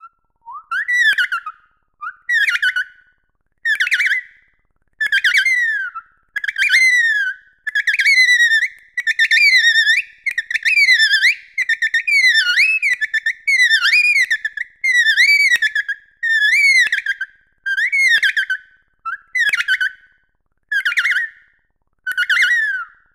I made this sound in a freeware synthesizer (called fauna), and applied a little reverb.
alien, animal, animals, creature, critter, dolphin, dolphins, sea, space, synth, synthesized